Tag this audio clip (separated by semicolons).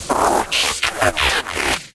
drone; engine; factory; futuristic; industrial; machine; machinery; mechanical; motor; noise; robot; robotic; sci-fi